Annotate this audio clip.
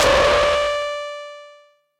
DRM syncussion german analog drum machine filtered thru metasonix modular filter.